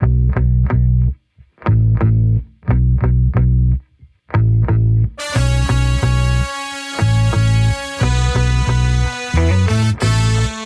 14 music mix(8) aL
Modern Roots Reggae 14 090 Bmin A Samples
090, 14, A, Bmin, Modern, Reggae, Roots, Samples